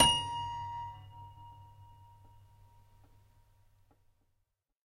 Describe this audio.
multisample pack of a collection piano toy from the 50's (MICHELSONNE)